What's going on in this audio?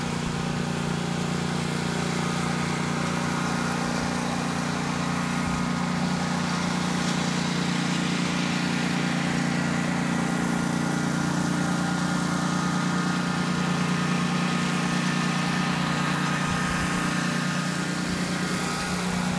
Lawnmower background sound
At closest to target it was recorded from about 33ft away from mower at farthest range about 40ft away. If you wanted the mower to be heard from an inside area you could add a high pass filter to the recording. Please note this wasn't taken with the average household mower it was taken with a heavy duty lawn mower used for cutting large areas of grass so it doesn’t have the same sound as a regular mower.
grass, lawnmower, outdoors